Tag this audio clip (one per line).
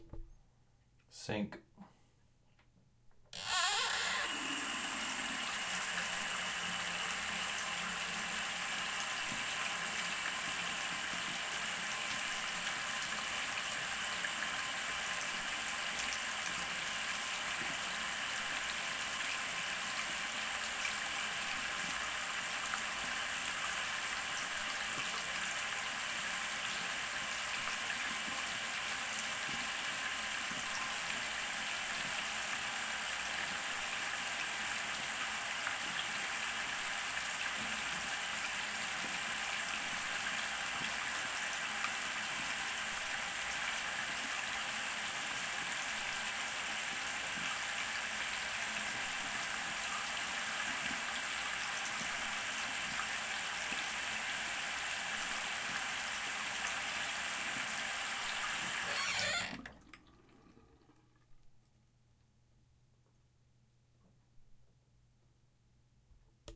bath
bathroom
drain
faucet
sink
water